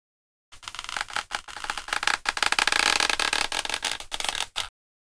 open old squeaky door
bending a empty, plastic bottle
door, squeak